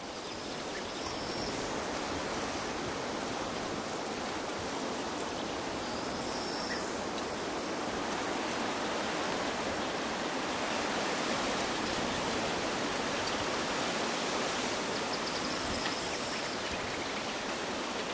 wind on a Willow tree, some birds in background. Rycote windshield > Sennheiser K6-ME62+K6-ME66 > Shure FP24 > iRiver H120. Unprocessed / viento en un sauce
field-recording, wind, willows, spring, donana, scrub, nature, ambiance, south-spain